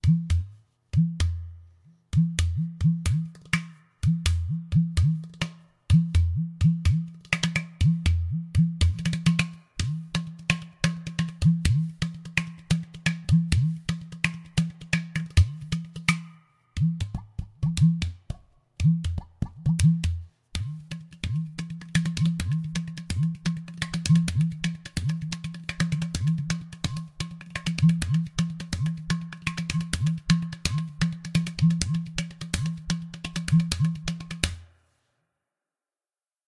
Just a short loop of my udu